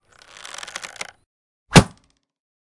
Regular Arrow Shot with rattle
Regular wooden bow